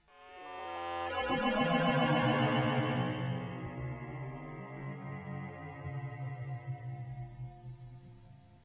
Space Whirr
A very weird sound I stretched and manipulated of my computer mic giving off some kind of feedback. I thought it might be cool as a spaceship or sci-fi sound effect.
computer, effect, error, fantasy, glitch, laser, mic, sci-fi, scifi, space, Spaceship, weird